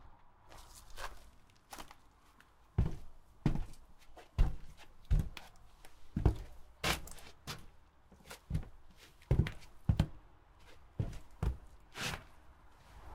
footsteps shoes hollow wood platform
footsteps, hollow, platform, shoes, wood